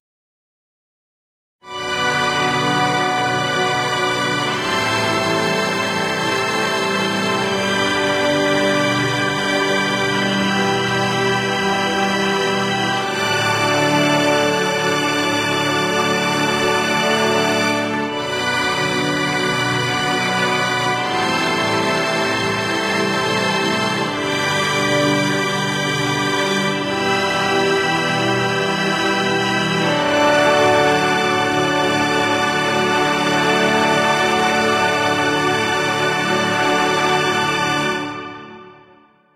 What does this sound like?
An emotional, dramatic burst of movie theme sound.
climax, music, movie, instrumental, orchestral, grand, chord-progression, emotive, ending, ambient, loop, strings, atmosphere, emotional, background, cinematic